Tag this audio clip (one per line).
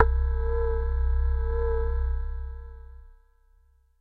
keyboard
keys
multisample
reaktor